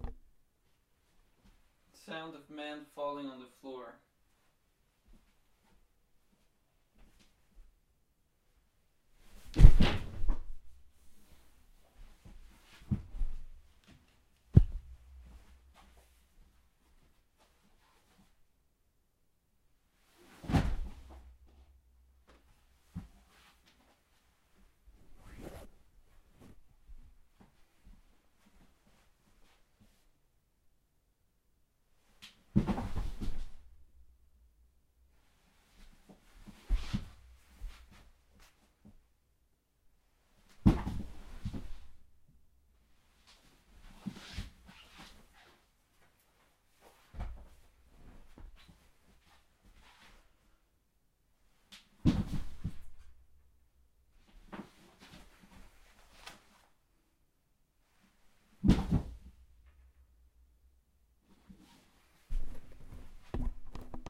falling on floor
recorded sounds of me falling on a wooden floor with my h4n.
person, thump, floor, falling, fall